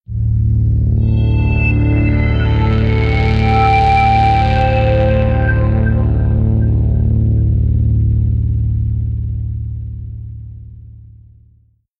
A short ambient musical effect to illustrate an uncovering of a dark mystery :)
atmosphere, calm, dark, effect, electronic, free, fx, game, mystery, soundscape, soundtrack